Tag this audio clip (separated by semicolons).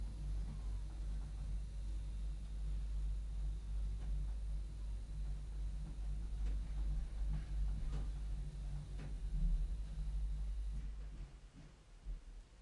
operate; machine; elevator; inside; lift